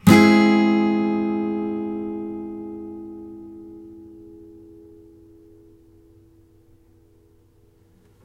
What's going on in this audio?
The next series of acoustic guitar chords recorded with B1 mic through UB802 mixer no processing into cool edit 96. File name indicates chord played.
a, acoustic, chord, flat, guitar